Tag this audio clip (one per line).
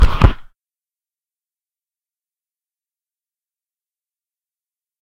bump
hit
mic